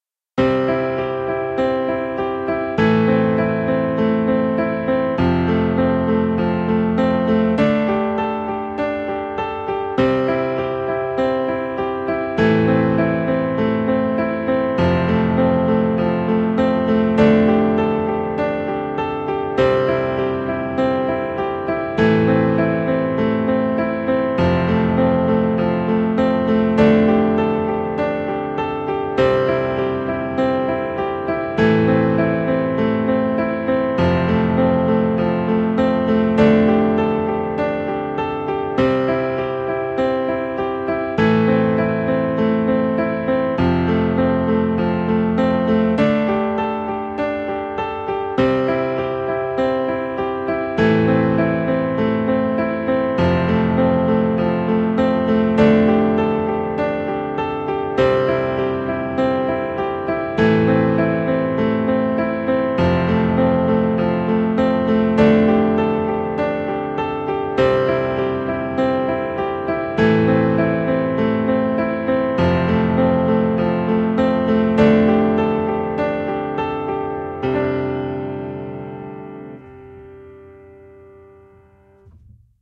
free music for projects - made with vst instruments